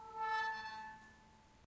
Gate squeak 2
close, creak, door, field-recording, foley, fx, gate, hinge, hinges, metal, metallic, open, squeak, squeaking, squeaky